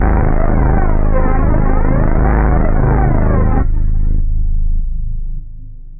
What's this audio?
THE REAL VIRUS 12 - FUZZBAZZPHLANGE -G#0
This is a fuzzy bass sound with some flanging. All done on my Virus TI. Sequencing done within Cubase 5, audio editing within Wavelab 6.
bass, flange, lead, multisample